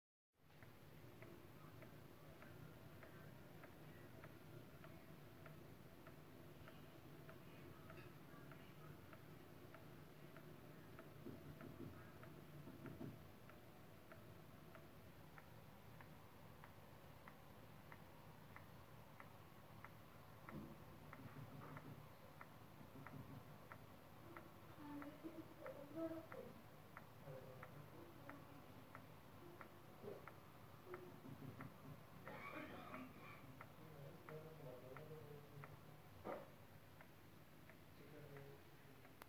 kitchen-sink-drop
Quiet water dripping from a kitchen sink tap, with a rate going from approximately 99bpm down to 94bpm by the end of the recording. Captured with my smartphone's voice notes app. There's also some human voices in the background, possibly from another room or a TV.
quiet, field-recording, faucet, sink, dripping, pulse, tap, rhythmic, kitchen, drop, water